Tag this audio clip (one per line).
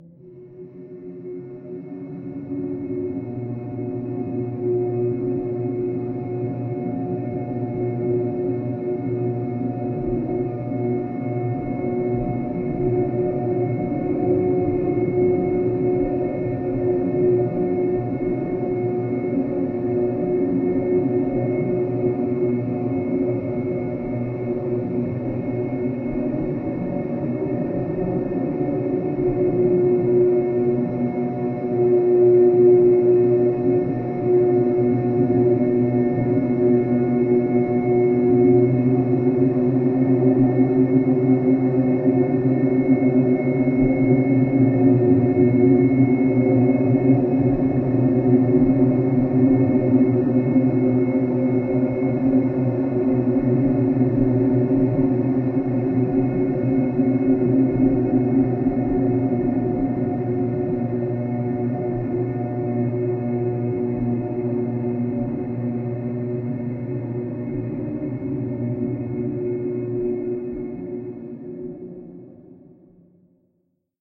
industrial
multisample
background
soundscape
drone